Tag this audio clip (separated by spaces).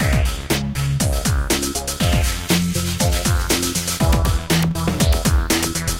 drum-loop drums beats